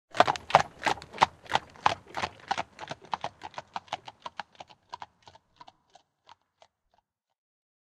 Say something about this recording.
Horsewagon from 18th century